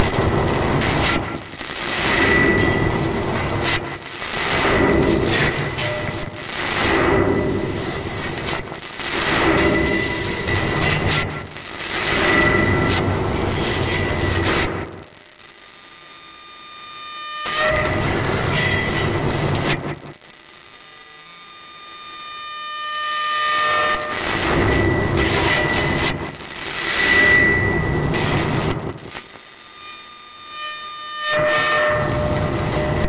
Heavy Steel Pipe 01 Glitch Slipping
The file name itself is labeled with the preset I used.
Original Clip > Trash 2.
cinematic, clang, clank, distortion, drop, hit, horror, impact, industrial, metal, metallic, metal-pipe, percussion, ping, resonance, ringing, scary, sci-fi, smash, steel, steel-pipe, strike, sustained